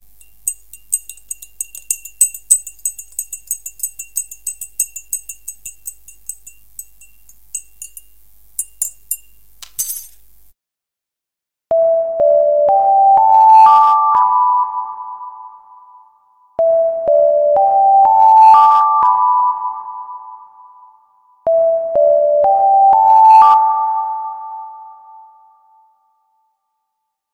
TAI cep melodi 000
This is a melody for my mobile. I want my cellphone rings but not disturbs anyone. If I don't hear the tea spoon noise, the break time bell of the facility rings.
bell, spoon, mobile, alarm, non-disturbing, melody, reverb, tea, phone